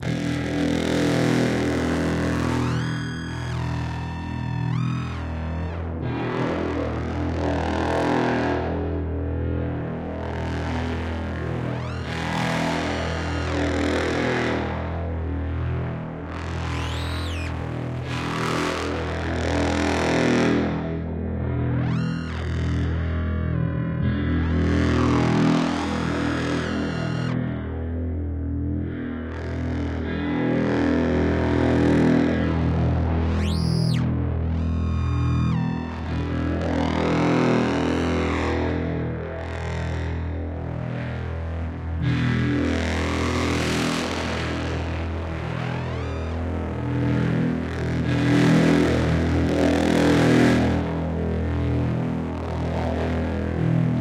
Terror/Psycho Stereo Ambiance
ambiance, ambient, anxious, atmos, atmosphere, background, creepy, disturbing, fear, haunted, psycho, scary, sinister, soundscape, spooky, terrifying, terror, thrill, unsetteling